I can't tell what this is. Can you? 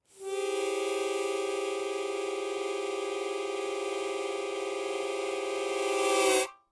Chromatic Harmonica 19
A chromatic harmonica recorded in mono with my AKG C214 on my stairs.
chromatic, harmonica